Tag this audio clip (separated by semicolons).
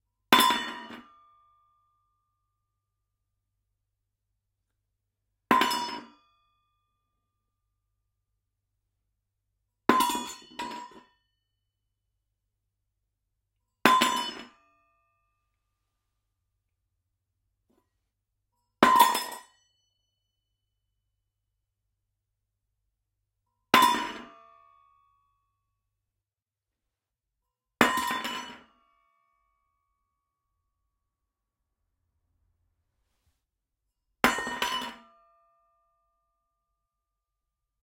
ladle; spoon